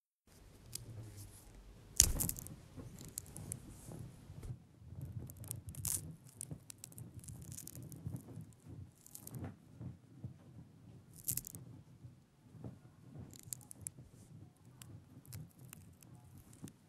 torch and fire sound effect
fire, sfx, sound-effect, torch